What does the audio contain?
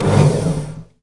Samples of tools used in the kitchen, recorded in the kitchen with an SM57 into an EMI 62m (Edirol).
hit, machine, unprocessed